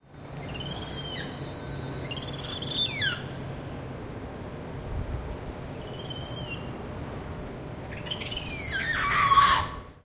black spider monkey01
Recording of a Black Spider Monkey chattering and screaming. Recorded with a Zoom H2.